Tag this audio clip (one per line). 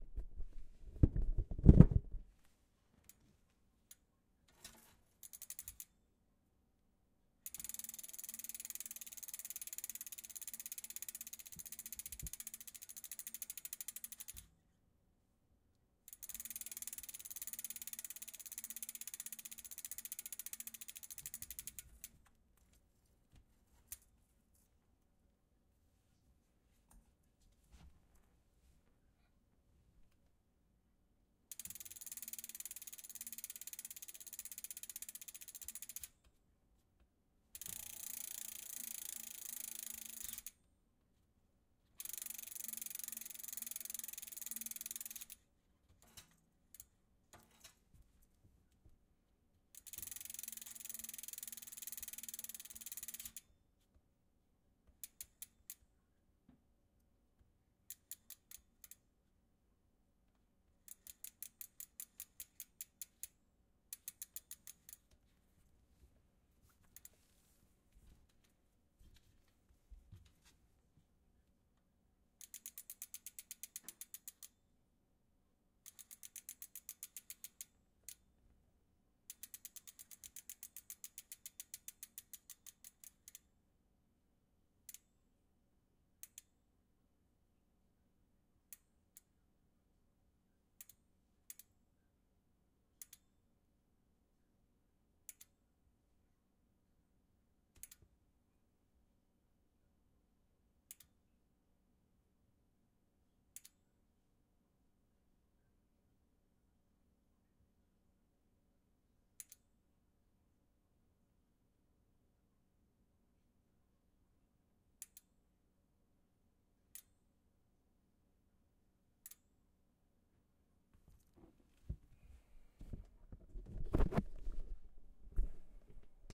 bicycle
safe
fx
wheel
crack
spin
bike